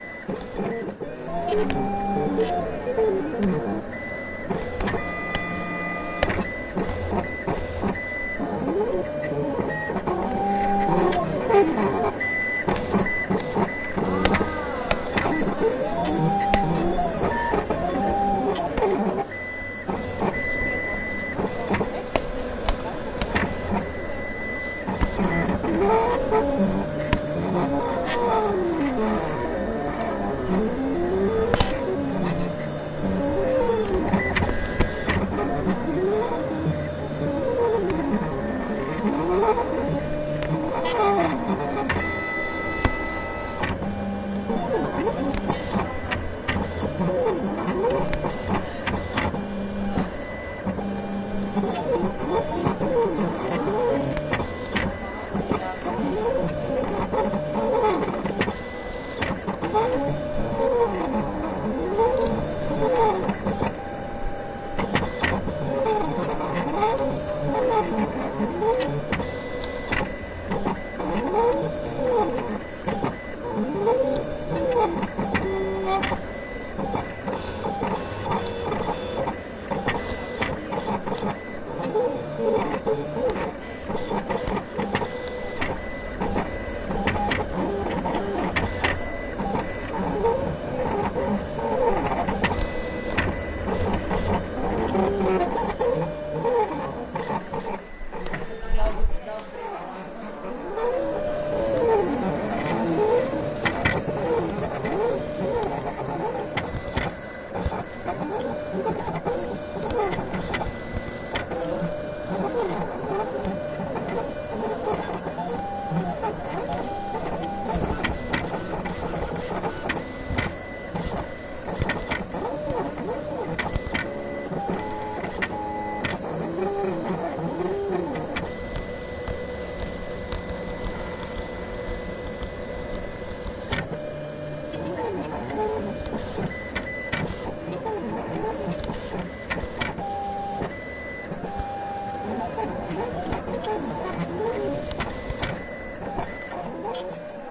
VYLIL PLOTTER MELODIC
Sound of an operating vinyl plotter, with bits of arpeggio-like sequences.
90, abstract, computer, digital, electric, electronic, future, machine, melodic, music, noise, printer, robot, s, sci-fi, strange, weird